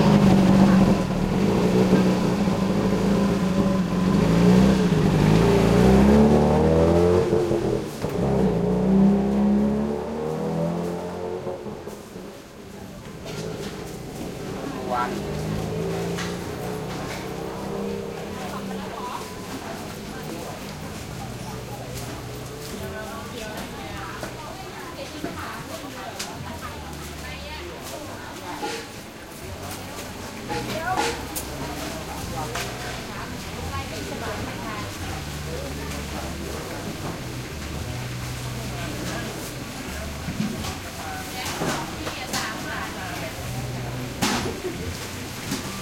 Thailand Bangkok side street market morning activity and motorcycles3 +loud close partial moped passby at start
Thailand, activity, market, morning, motorcycles